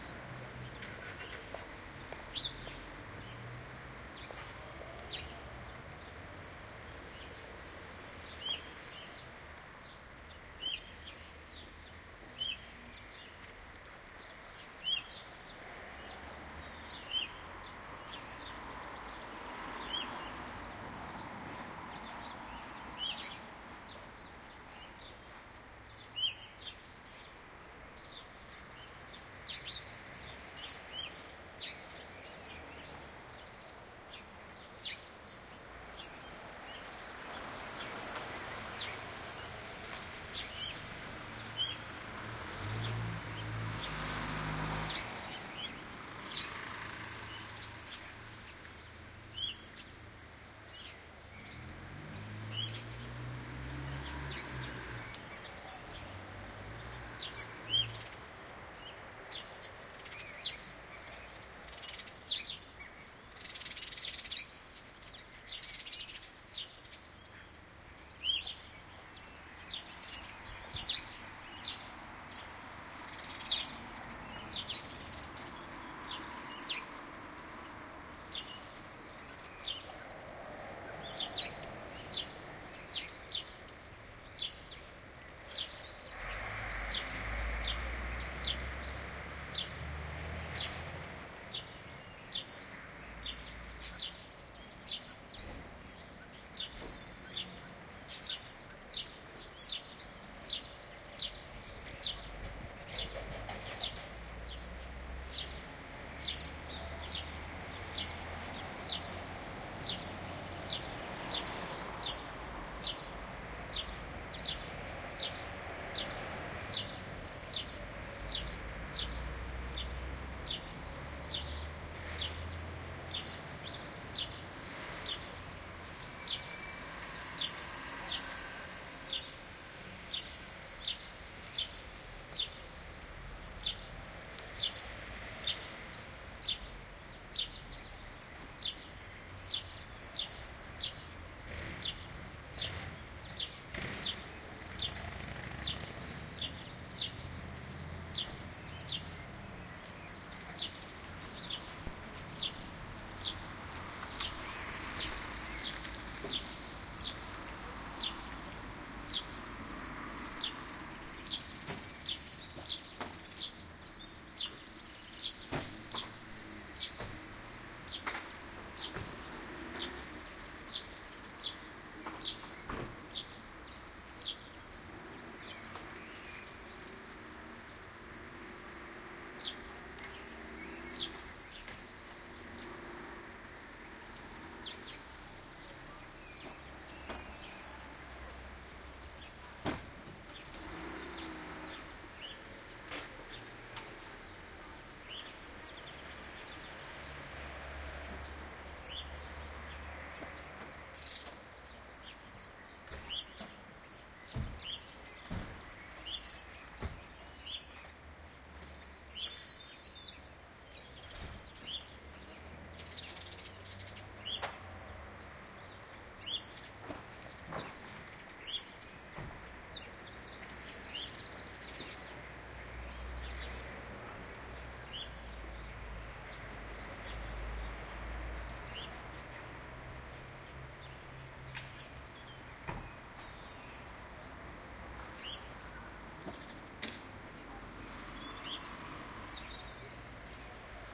Carpark in a scottish toon
Here are a few minutes of what the small carpark in Crieff, Scotland, sounds like, Very peacefull for that, maybe because there wasn´t much traffic and those sparrows made a nice sound. Soundman OKM II, A3 adapter and IHP-120 from iRiver.
binaural, carpark